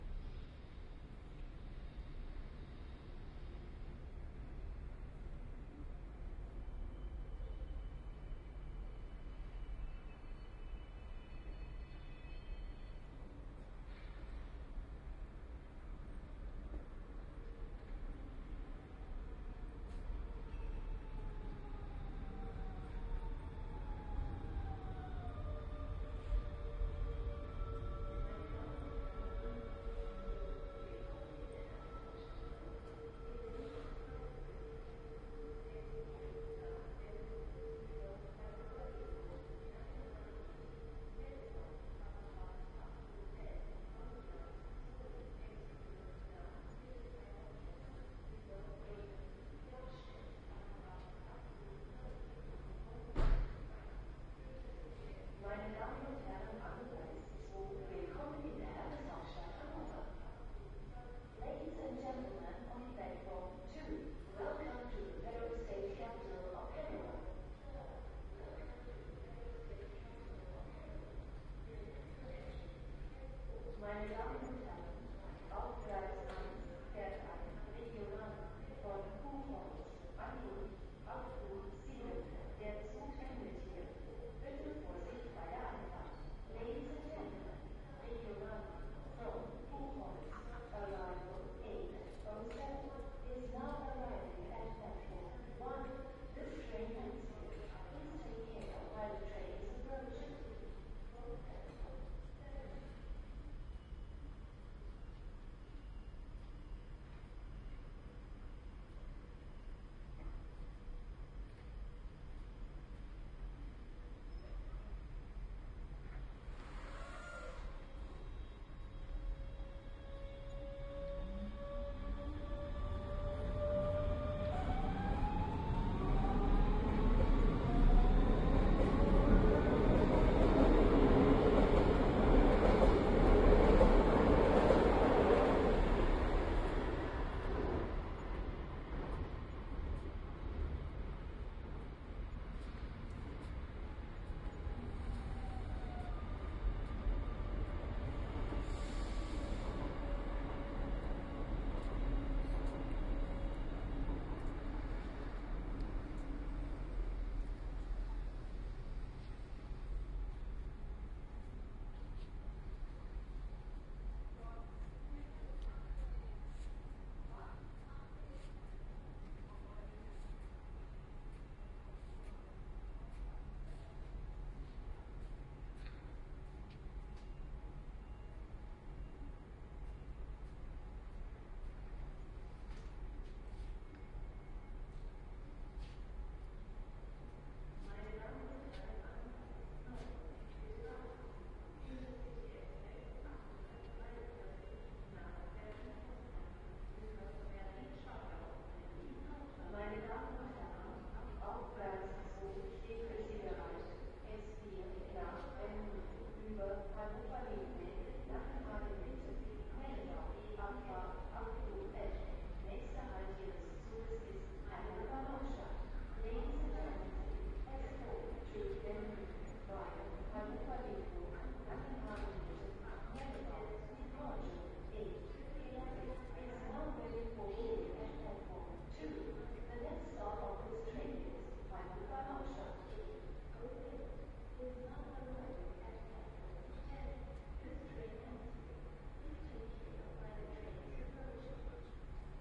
There is nothing better to pass the time, waiting for a train, then to do a bit of good old fieldrecording! Hanover station, OKM binaural microphones, A3 adapter into R-09 HR recorder.
bahnhof, train, trainstation